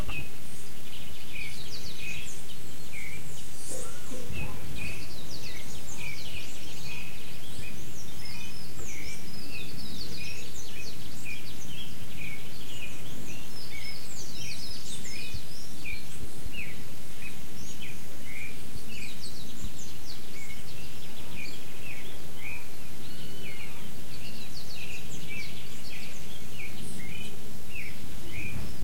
morning-birds short02
Recording taken in November 2011, at a inn in Ilha Grande, Rio de Janeiro, Brazil. Birds singing, recorded from the window of the room where I stayed, using a Zoom H4n portable recorder.
birds, field-recording, ilha-grande